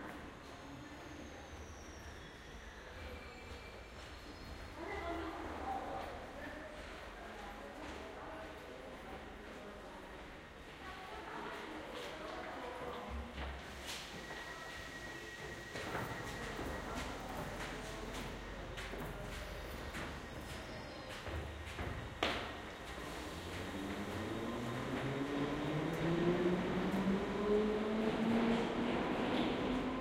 30-sec binaural field recording, recorded in late 2012 in London, using Soundman OKM II microphone.
This recording comes from the 'scene classification' public development dataset.
Research citation: Dimitrios Giannoulis, Emmanouil Benetos, Dan Stowell, Mathias Rossignol, Mathieu Lagrange and Mark D. Plumbley, 'Detection and Classification of Acoustic Scenes and Events: An IEEE AASP Challenge', In: Proceedings of the Workshop on Applications of Signal Processing to Audio and Acoustics (WASPAA), October 20-23, 2013, New Paltz, NY, USA. 4 Pages.